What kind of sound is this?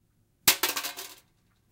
crash06 cheese grater
Metal cheese grater dropped. Recorded with ECM-99 to Extigy sound card. Needed some elements for a guy crashing into some junk. Accidentally had phonograph potted up on mixer - 60 cycle hum and hiss may be present. Used noise reduction to reduce some of this.
crash, soundeffect